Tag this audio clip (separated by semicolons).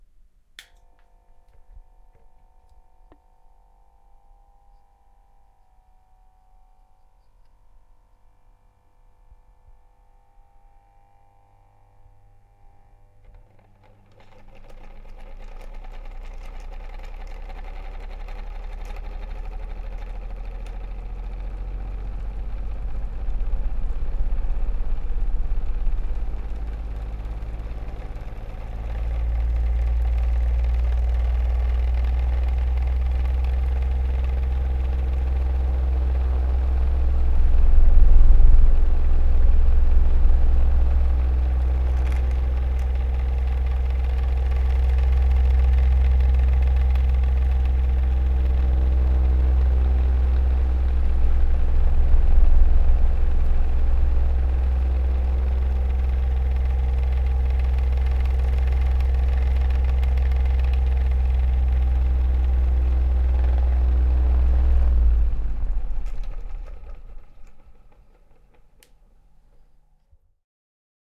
fan; flow; motor; old; rotor; wind